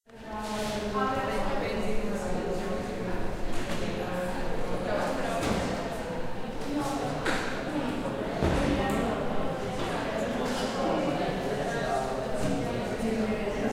Interior ambience UPF library Poblenou Campus
interior, library, Poblenou, Campus